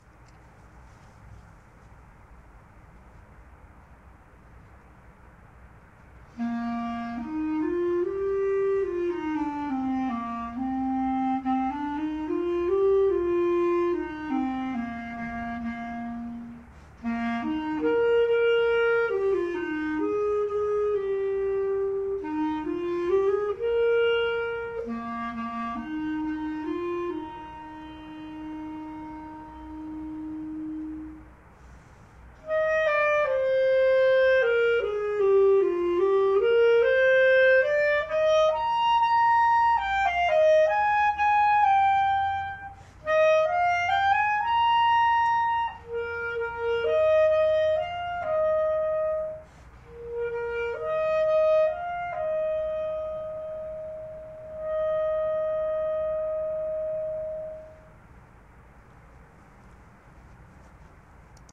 Ralph Vaughn Williams' Six Studies in English Folk Song II practice performance session